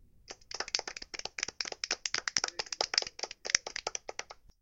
A crowd golf clapping. Actually just layers of me clapping. Recorded with a CA desktop microphone.
crowd, polite